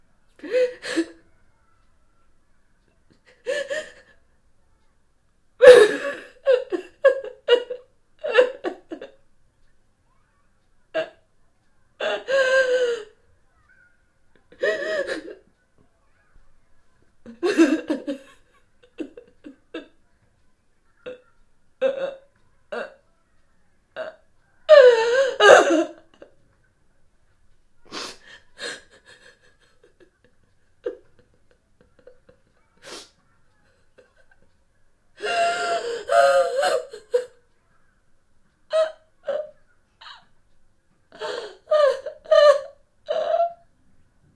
woman crying sobbing cry sob sad
sobbing, woman, sob, crying, cry, sad